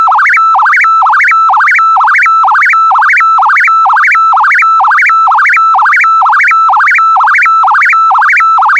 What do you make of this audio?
archi scifi alarm danger 11
Science fiction alarm indicating danger. Synthesized with KarmaFX.